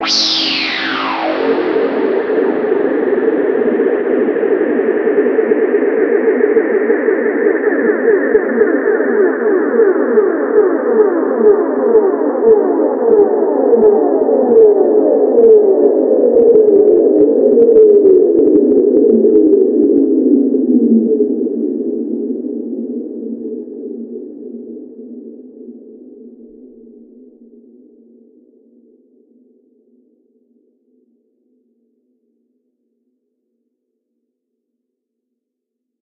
Sireny Thing
effect, sound